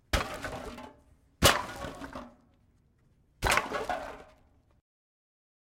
clanging a bag of aluminum cans
clang, can